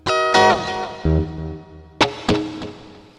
guitar.coda02
a short coda played with Ibanez electric guitar, processed through Korg AX30G multieffect (clean)
electric-guitar, musical-instruments